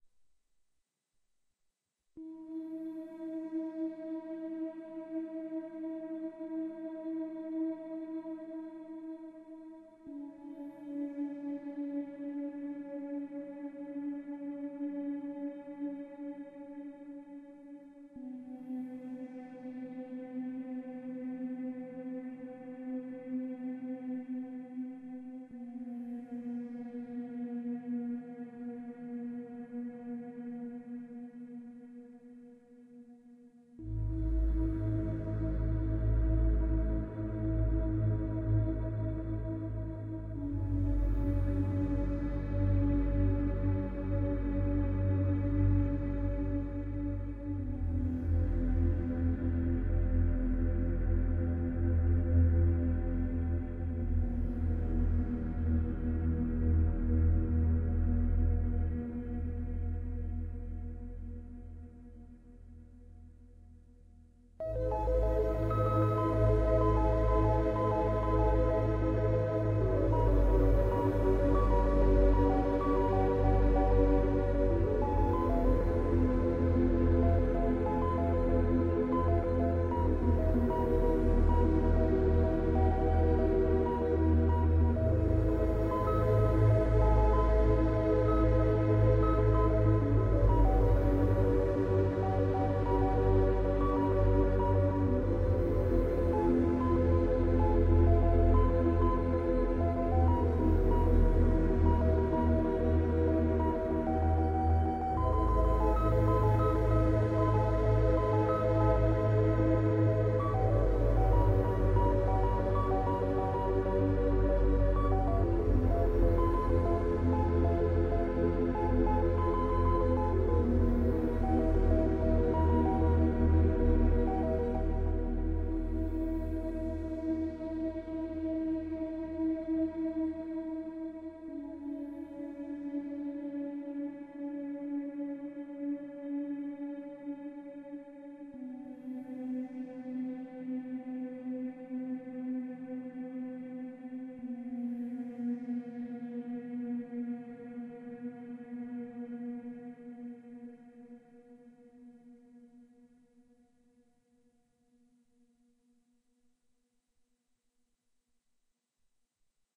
relaxation music #51
Relaxation Music for multiple purposes created by using a synthesizer and recorded with Magix studio.